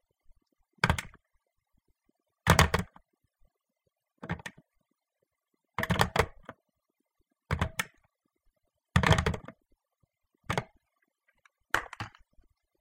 Picking up and putting down a telephone.
pick, up